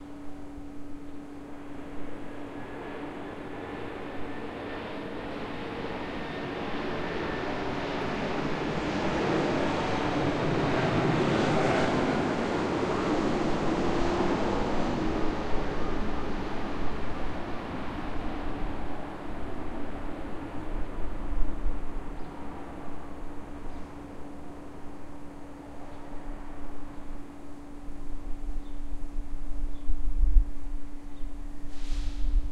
Hi:
This is a very short recording of an airplane flying over my apartment in Mexico City.
Enjoy the sound!